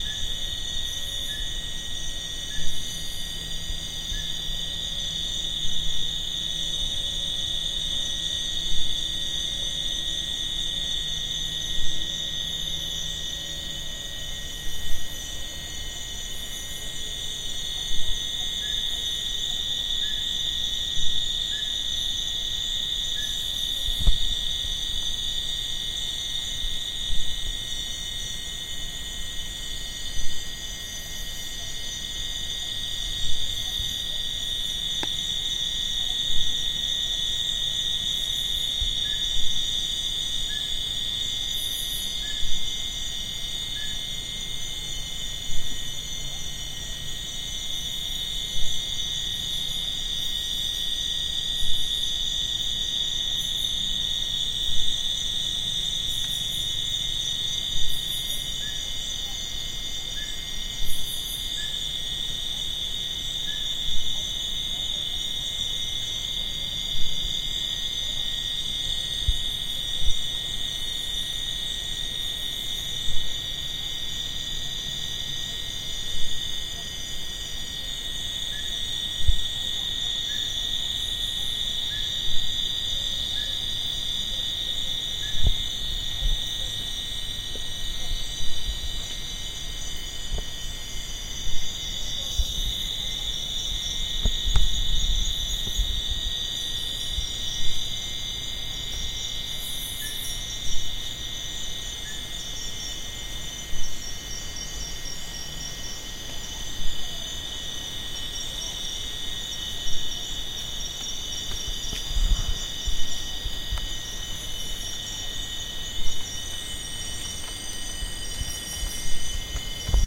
taman negara crickets and peeps
sounds of birds insects and miscellaneous rainforest creatures recorded in Malaysia's stunning Taman Negara national park. Uses the internal mic on my H4 Zoom.